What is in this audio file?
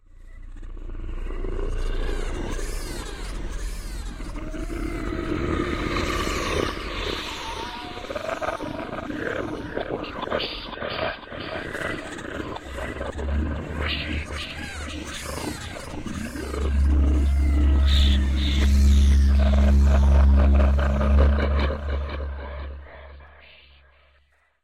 This is an edit, so full credit should go to SoundBible and Adam Webb.